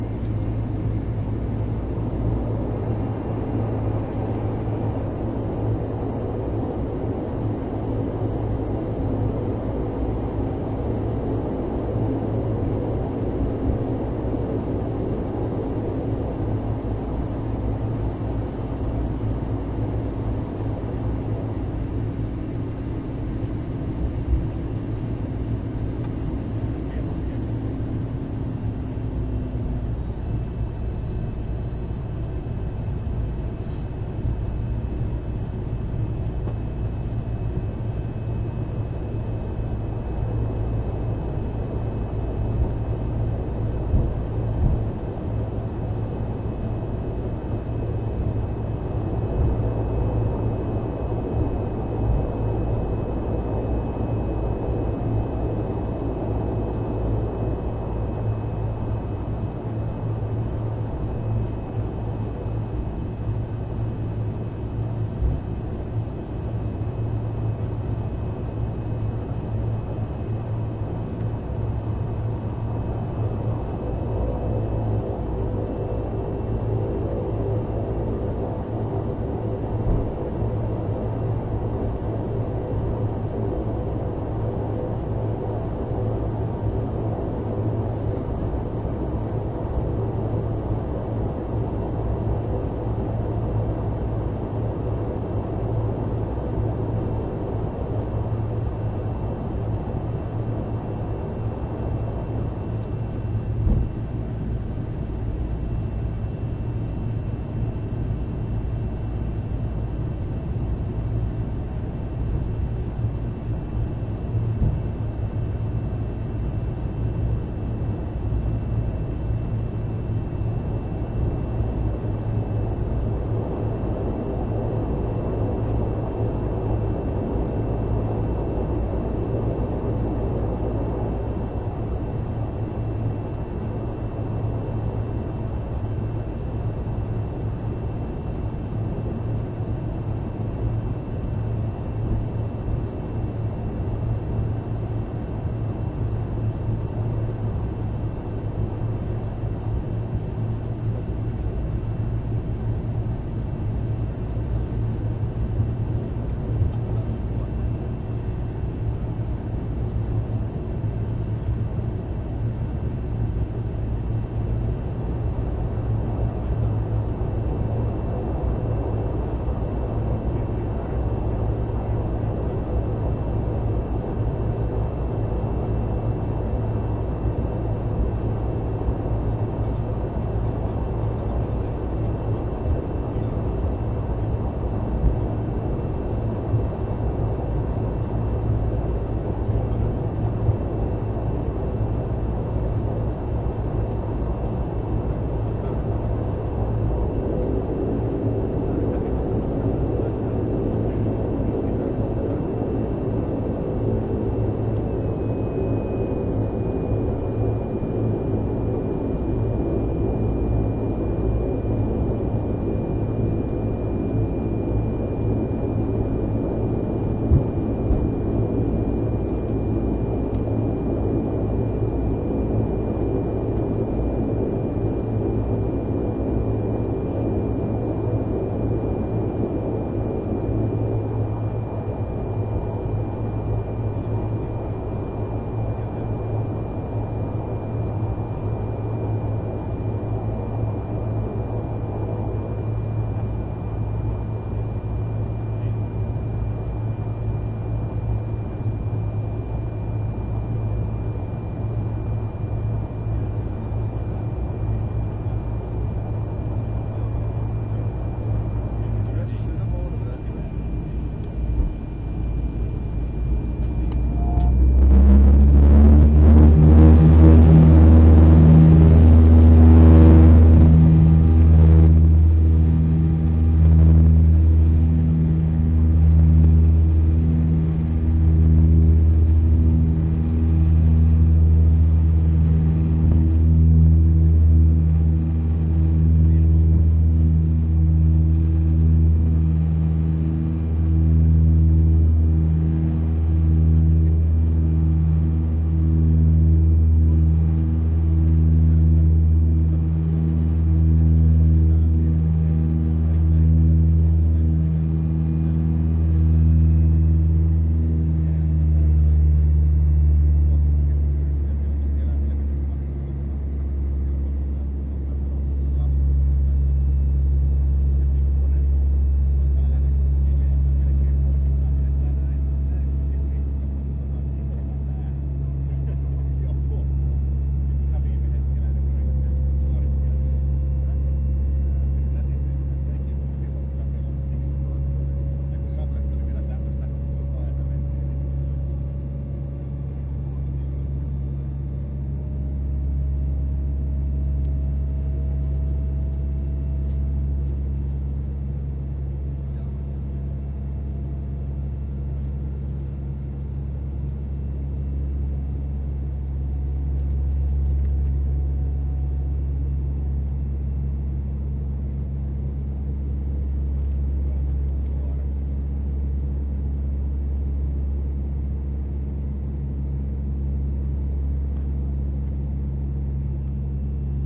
Airplane Small Propeller Take off
Recording of a small airplane taking off from runway. Recording from inside the plane.
fieldrecording, plane, airplane, takeoff, flight